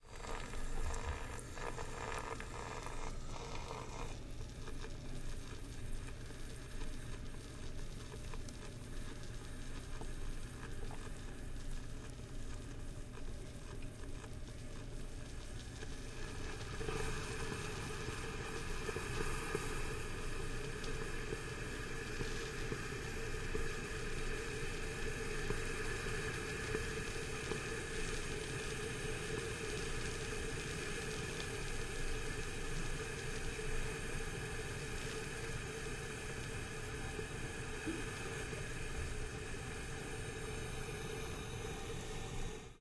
sound of steam from coffee maker after brew